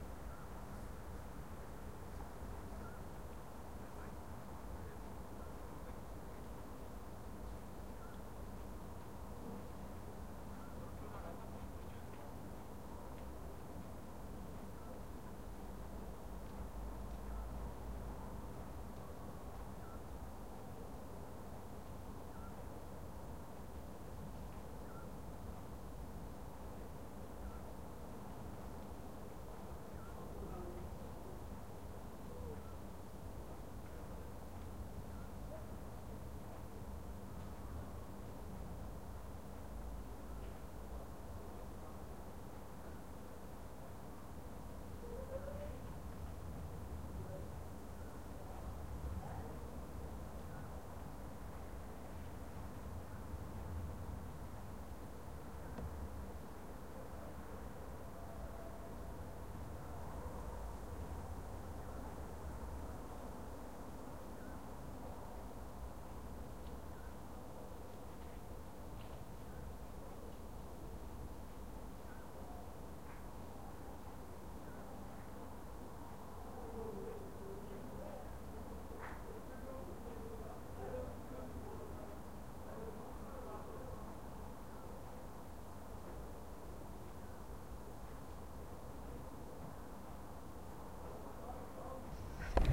Night Suburban Distance City Hum Distance Talking
A nighttime recording with the distant sounds of city hum and faint talking in the suburbs.
Recorded with Tascam DR-40
ambience, birds, city, distance, field-recording, hum, night, summer, talking